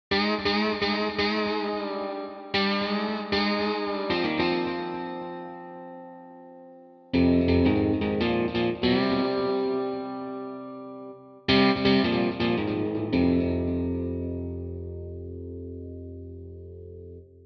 Guitar Solo P101
Processed sequence of lead-guitar in the F-key at 110bpm